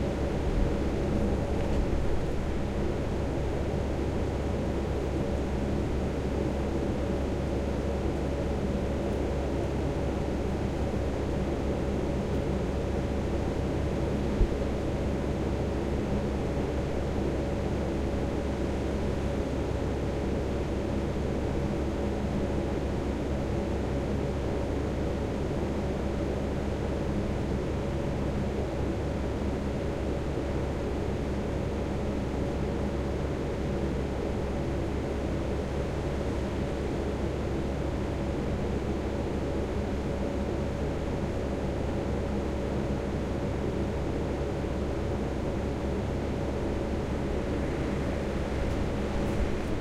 Fan Ventilation Stereo 5
Ventillation ambience from Lillehammer Norway
ambiance, ambience, ambient, atmo, atmos, atmosphere, background, background-sound, general-noise, soundscape, white-noise